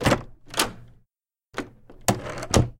Dorm Door Open Close
An edited version of pagancow's Dorm Door Open and Dorm Door Close - a large door with an industrial, metal doorknob opening and then closing. I removed a little of the background noise and combined the two files.